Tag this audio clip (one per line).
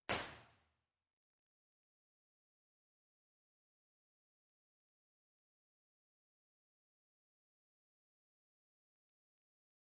impulse-response
ir